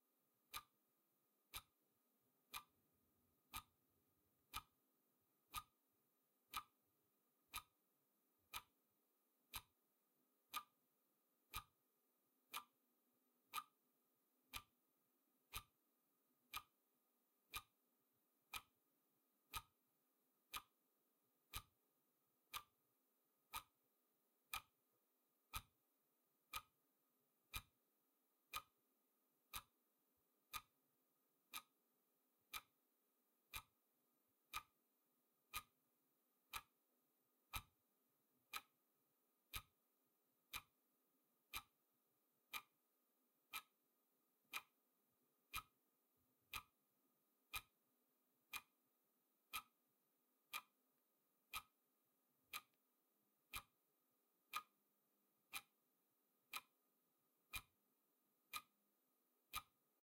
a small clock ticking with a bit of room ambience (reverb).
MKH60-> ULN-2.